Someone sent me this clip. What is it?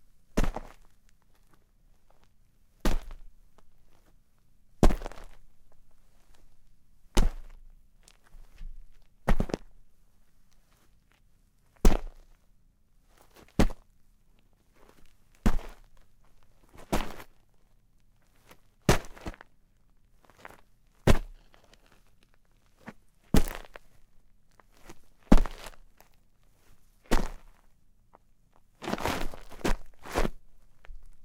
footsteps boots gravel dirt quick but separated
dirt, footsteps, boots, gravel, quick